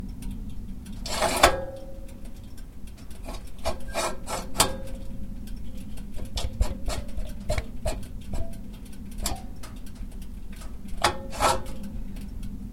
wood stove damper 01
I mess around with the damper on a wood stove as it burns. You can hear it heating up in the background.
scratch, metal, flame, bang, stove, fireplace, burn, pull, heat, fire, flames, burning